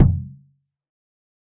This was for a dare, not expected to be useful (see Dare-48 in the forums). The recorded sound here was a big, thin, plastic salad bowl (the disposable kind you get from catered take-out) being hit by something. The mixed sound was a complex drum-like percussion sound sound I created in Analog Box 2, along with the impulse (resampled to 4x higher pitch) used in the kick drum sound also from Analog Box 2. This one is supposed to fill the role of a floor tom. A lot of editing was done in Cool Edit Pro. Recording was done with Zoom H4n.